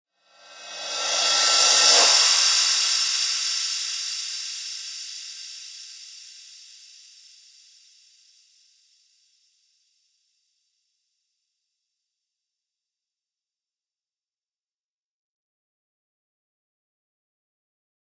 Rev Cymb 12 reverb
Reverse Cymbal
Digital Zero
reverse, cymbal